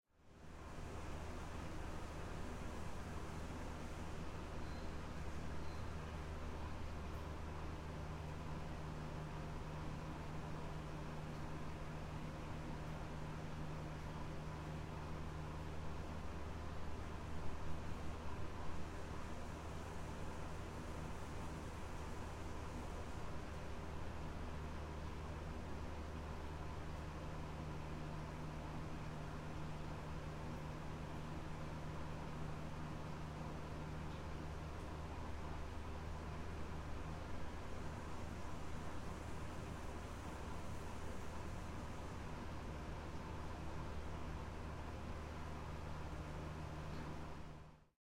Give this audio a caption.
20200407 A Fan with Faulty Beeping Sound 01
A Fan with Faulty Beeping Sound
Microphone: MXL Cr89
Audio Interface: Focusrite Scarlett Solo (Gen2)
ac, air, air-conditioning, beep, ventilation, ventilator, wind